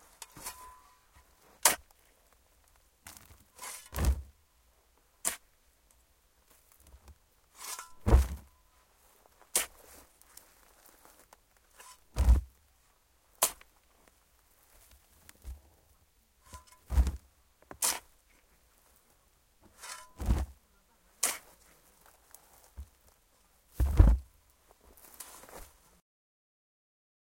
Digging a Hole and Shovelling Soil/Dirt 2
Another recording of me filling a hole with soil.
Sound of spade picking up soil and then the soil falling to the bottom of the hole.
dig, digging, digging-a-hole, dirt, earth, ground, hole, planting, soil, spade